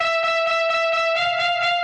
130 Brass Lixx 04
Brass hit/licks melody